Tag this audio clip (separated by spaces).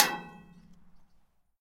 hitting percussive